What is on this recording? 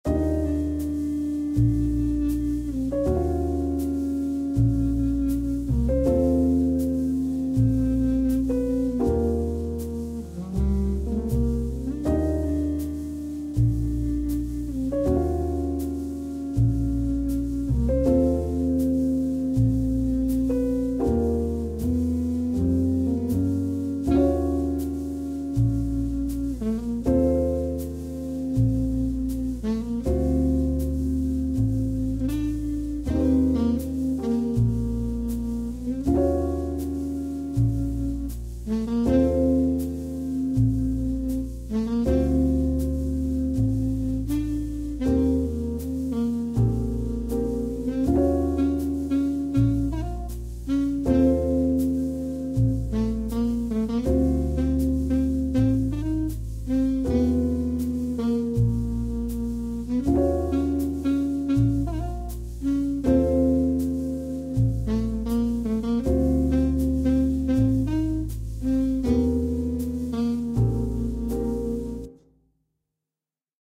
Jazz Background Music Loop
Title: Shop Jazz
Genre: Jazz
I've been listening to hotel music and got inspired to compose this Jazz genre. I'm using FL Studio with some free samples & VST. I know Jazz is a colorful beings, I'm sorry for chords repetition because it's hard to make it natural and I don't have a keyboard controller either.
Loop, Percussion, Brass, Jazz, Relaxing, Music, Background, Bass